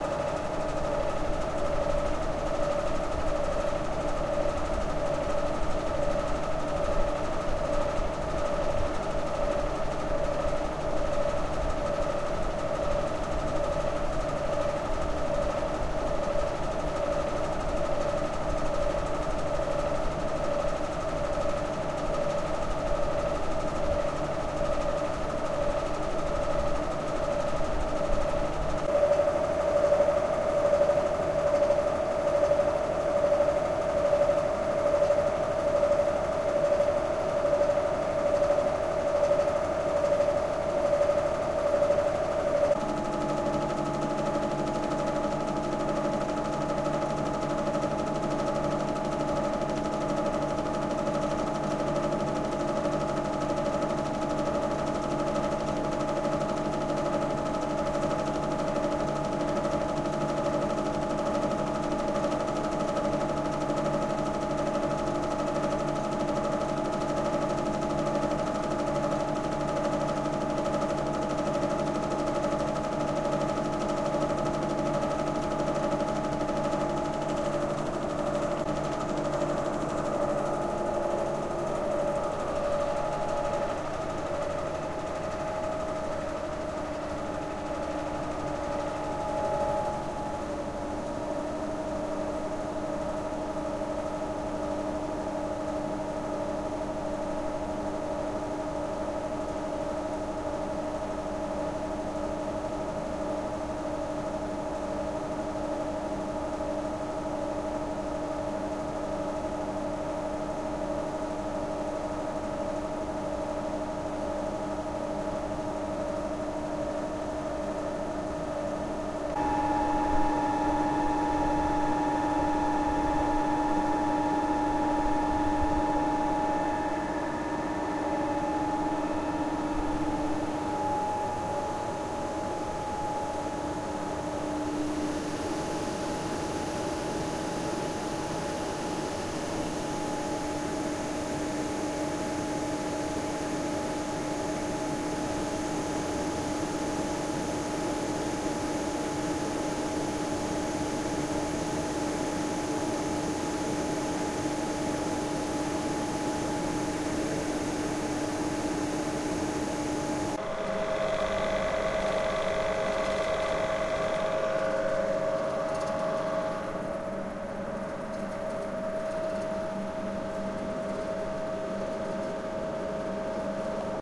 INT TECHNICAL ROOM MECHANICAL SPIN
Recorded at -2 floor of hospital. Room with big building warming mashines.
int, mashines, mechanical, room, spin, technical